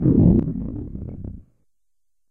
Overhead explosion, muffled by dense matter, ideally suited to environment where explosion is heard above ground, while underground, or in the distance overground.
Could also be an underwater detonation